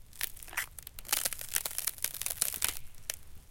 Ice Crack 5
foley, ice-crack, ice, break, crack, melt